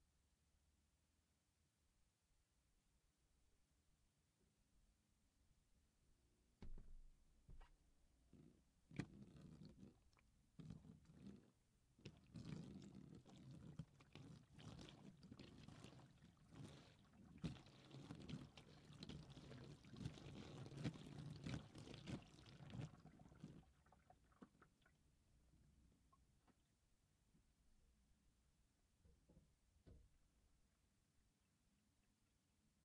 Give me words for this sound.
water on glass
Liquid, Splash, GLASS, Water